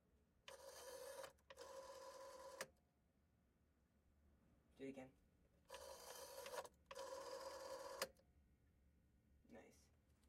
rotary phone dial
a rotary phone being dialed.
old-phone, foley, dial, phone